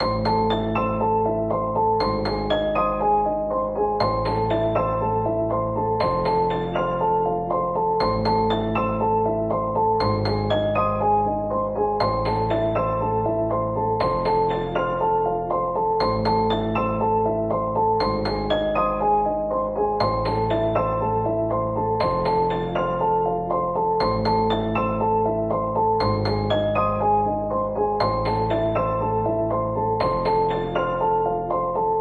Dark loops 204 piano octave with melody short loop 60 bpm
This sound can be combined with other sounds in the pack. Otherwise, it is well usable up to 60 bpm.